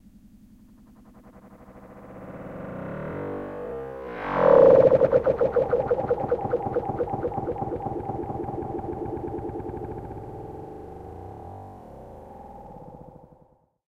ice fx
Some sound effect made of a cracking ice sample, processed in ableton.